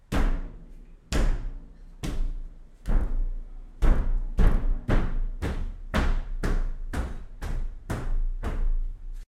niño camina en un piso de superboard